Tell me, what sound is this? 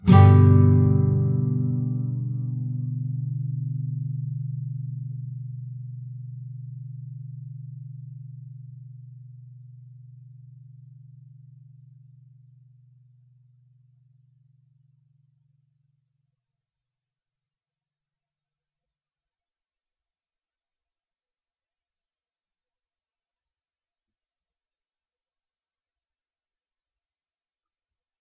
Standard open G Major chord. Down strum. If any of these samples have any errors or faults, please tell me.